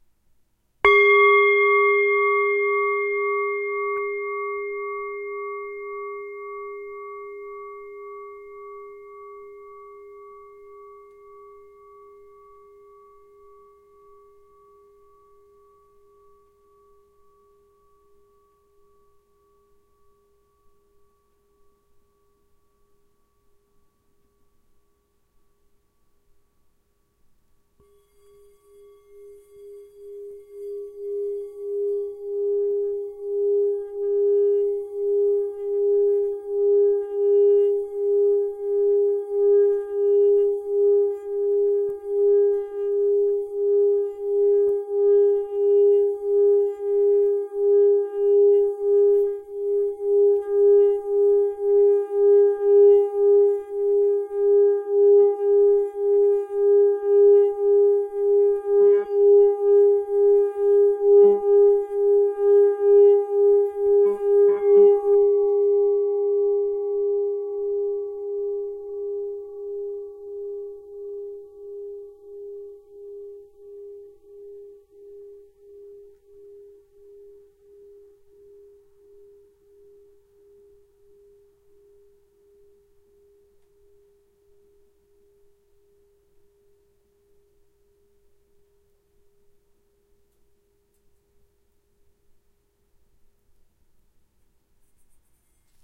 Pentatonic Bowl #3 Sample 1
Pack Contains:
Two 'drones' on a 11 inch diameter etched G2 pitch Himalayan bowl; a shorter drone on the bass and a longer drone with both bass and first overtone. Droning done by myself in my home.
Also contains pitch samples of a 5 bowl pentatonic scale singing bowl set of old 'cup' thado bowls, assembled by myself. Each sample contains both a struck note and a droned note. Some bowls have more than one sample for no particular reason. All performed by myself.
antique, brass, himalayan, meditation, meditative, pentatonic, percussion, relaxation, relaxing, scale, singing-bowl